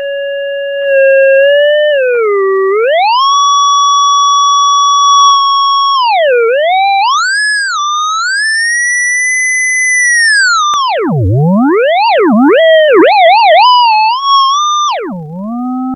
Program went crazy and locked up when trying to record in stereo so I created some stereo versions.
theremin, free, sound